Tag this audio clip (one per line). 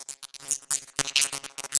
abstract
digital
effect
electric
electronic
freaky
future
fx
glitch
lo-fi
loop
machine
noise
sci-fi
sfx
sound
sound-design
sounddesign
soundeffect
strange
weird